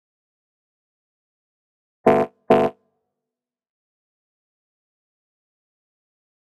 ist like a claxon or just something negative.
Made for an exercise to generate Abtract FX with oscilators